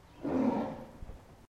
A tiger cry.
cat, tiger